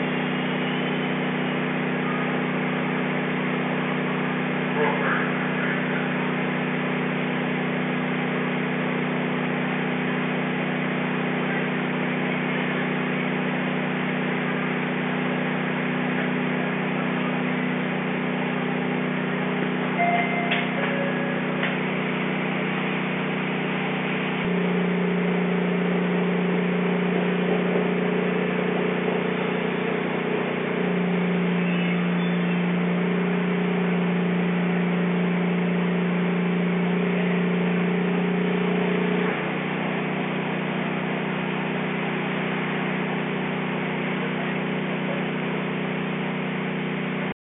Path train from New Jersey to New York recorded on an iPhone. Sometimes you just want a crappity sound like this.